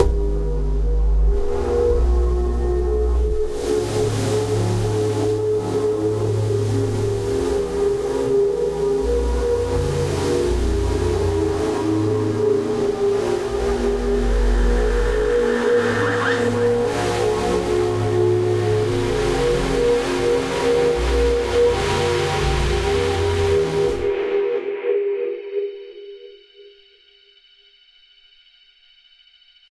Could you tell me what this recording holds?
Static Pad 1
This sound or sounds was created through the help of VST's, time shifting, parametric EQ, cutting, sampling, layering and many other methods of sound manipulation.
๐Ÿ…ต๐Ÿ† ๐Ÿ…ด๐Ÿ…ด๐Ÿ†‚๐Ÿ…พ๐Ÿ†„๐Ÿ…ฝ๐Ÿ…ณ.๐Ÿ…พ๐Ÿ† ๐Ÿ…ถ
loop, synth, techno, electronic